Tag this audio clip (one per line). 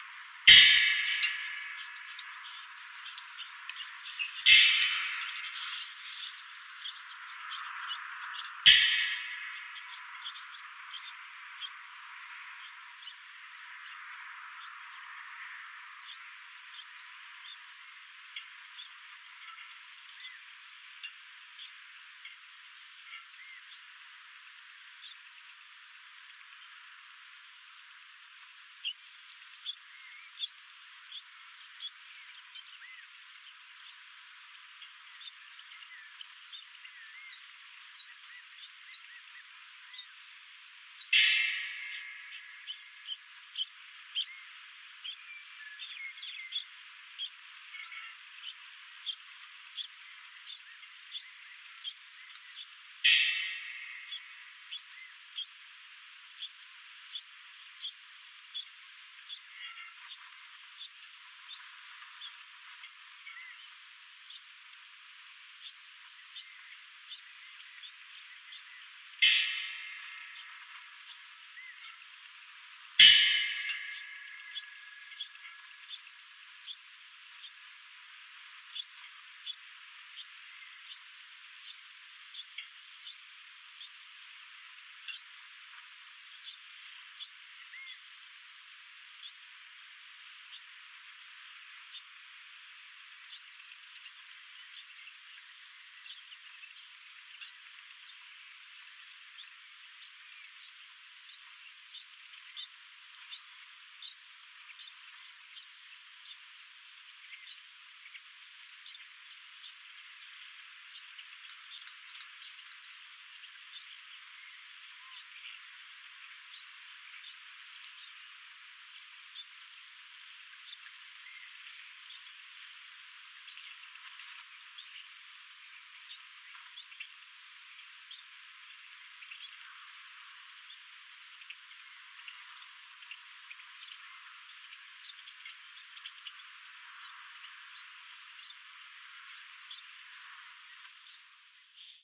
flagpole
ambience
cloth
rope